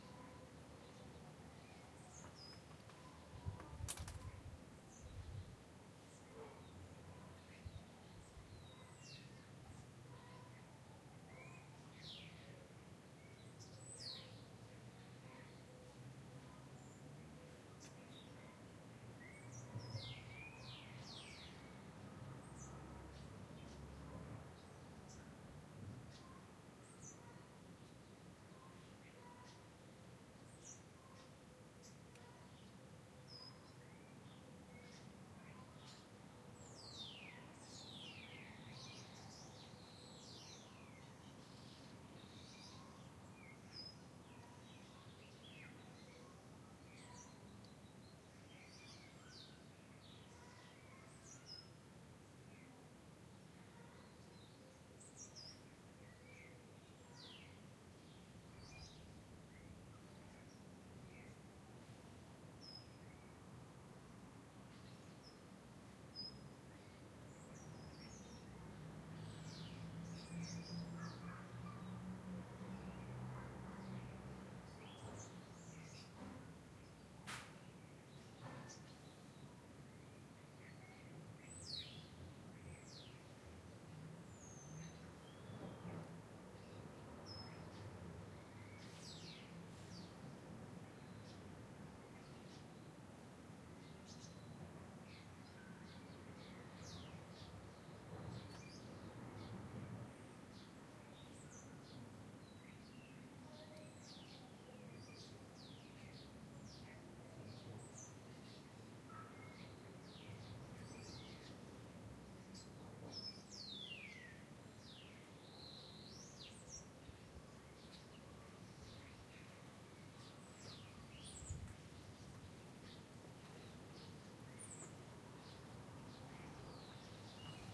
dogs and birds 03 mostly birds
Ambient sounds recorded in a village in portugal, August 2016 using a Zoom H1 recorder fitted with standard windshield.
Low cut filter in Audacity to remove windnoise below 100Hz.
Mostly birds on this recording.